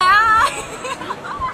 This sound belongs to a sample pack that contains all the sounds I used to make my Vietnam mix. (I'll post more info and a link on the forum.) These sounds were recorded during a trip through Vietnam from south to north in August 2006. All these sounds were recorded with a Sony MX20 voice recorder, so the initial quality was quite low. All sounds were processed afterwards.
vietnam, sound-painting, shout, laughter, asia, laughing